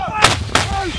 Weird Army Beat Loop
Soldier shouting and a weapon firing, can be used as a weird loop in psychological stress scenes or music.